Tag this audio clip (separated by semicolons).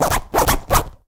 egoless; vol; noise; 0; zipper; scratch; sounds; natural